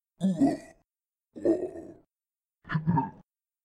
Male zombie getting hit. My first attempt to create a zombie sound. Recorded with Audacity.